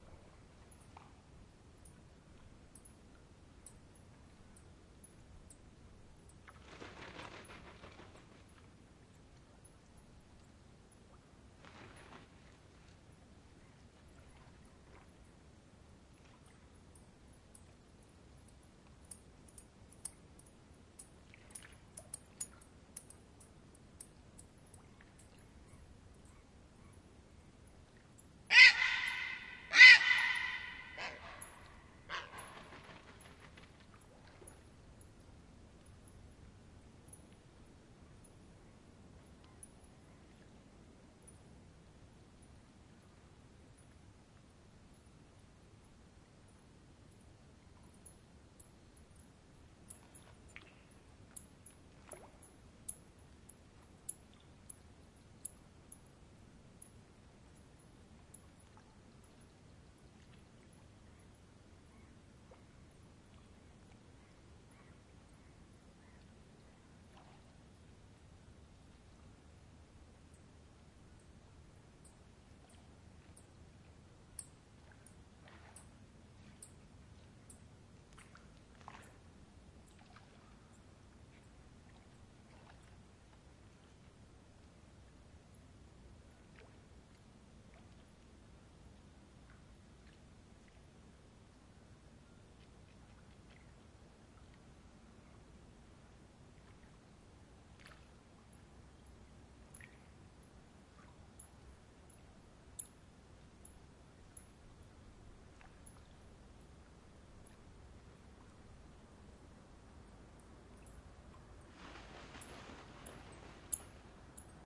20081208 1952 monfrague murcielago,buitre
Recorded in Monfragüe placing the microphone in front of a landform called "portillo". In this recording you can hear bats and a vulture. Fostex FR2-LE. Microphone Rode NT4. 08/12/2008 19:52